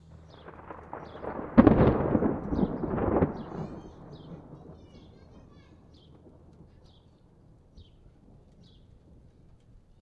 This is a loud crack. A sharp quick hit.